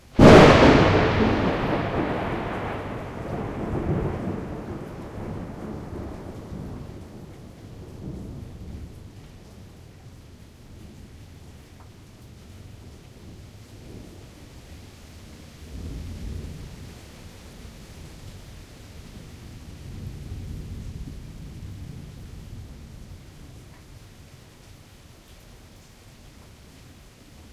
Loud thunder clap. Summer storm. Midwest, USA. Zoom H4n, Rycote Windjammer
Storm, Thunder, Thunderstorm, Weather